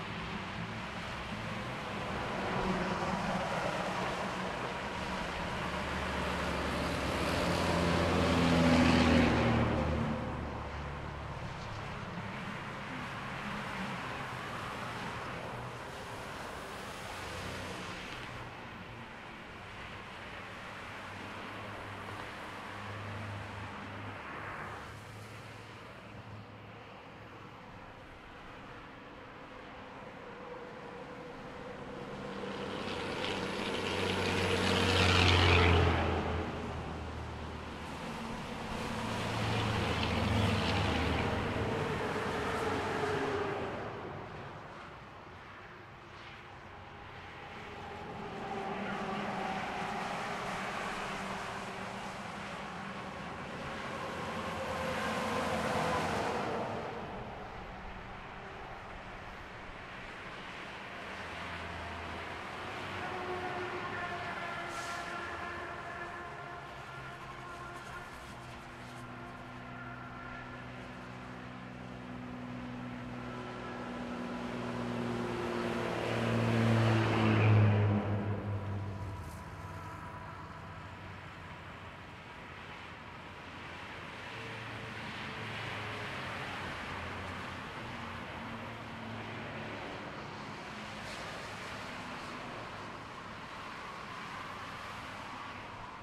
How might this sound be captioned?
Transito pesado de rodovia com muitos caminhões.